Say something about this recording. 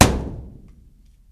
Metal hallow object hit
bass, big, boom, low, metal, object, thump